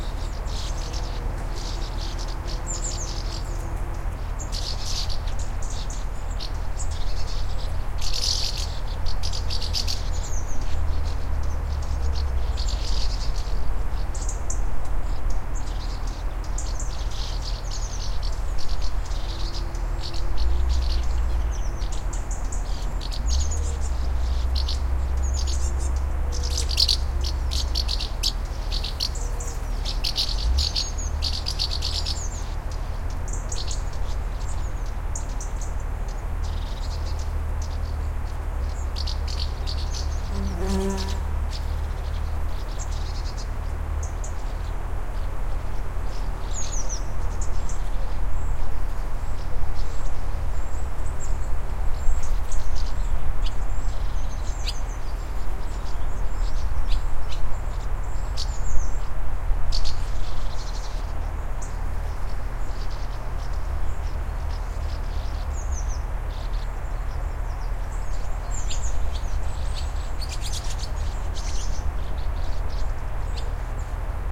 The sound of Sandmartins flying over a meadow. Recorded in August 2009 in Perthshire / Scotland, using 2 AT3031 microphones and an Oade Brother modified FR-2LE recorder.
birds, scotland, sandmartins, engine, field-recording